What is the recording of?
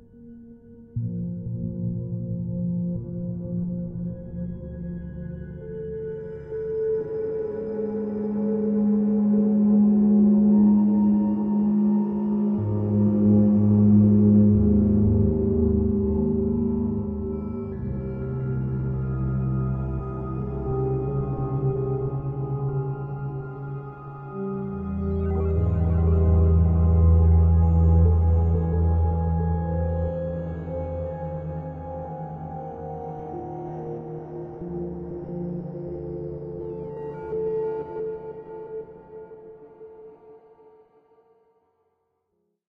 slow atmosphere 4
A tense atmosphere of synth, organ, and bell like sounds. Could be used as a background or intro. Part of my Atmospheres and Soundscapes 2 pack which consists of sounds designed for use in music projects or as backgrounds intros and soundscapes for film and games.